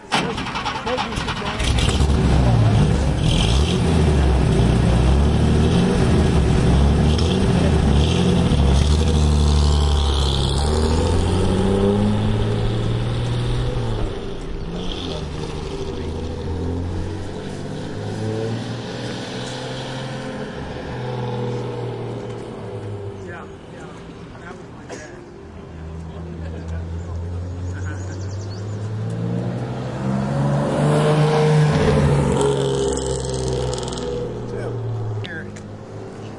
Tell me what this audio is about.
Ignition Starting and Engine Rev on a Vintage Race Car
Stereo recording of a vintage race car. Engine starts and the engine revs.
auto, car, engine, field-recording, idle, ignition, motor, pull-out, race, rev, revving